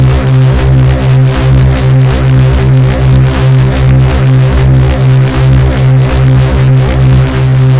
ABRSV RCS 020
Driven reece bass, recorded in C, cycled (with loop points)
harsh, bass, drum-n-bass, driven, heavy, reece